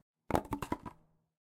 Wood Impact
Settings a small pile of logs down.
hit, impact, logs, pile, setting-logs-down, wood